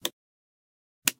12 V cap Close 1
12V port cap being closed.
port, cap, car